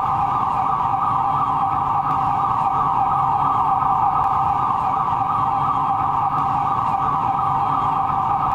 WIM sirene FlowerEdit!
ENTIRELY LOOPABLE remix of WIM's "sirene" sample, the original was 2.37 second, and didn't loop at all, I added some reverb then cropped the sound at the second loop, click repeat to show that it really does loop, and well at that too.
wim, whitenight, alarm, loop